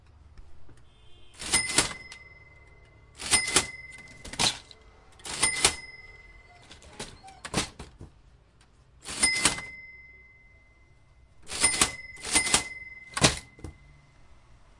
Cash Register,Sale Sound, old shop.stereo
Old cash-register open and close the sound of successful sale played a few times, little background sounds from the street nearby but rather clean.
Recorded using pair of cardoid condensers X/Y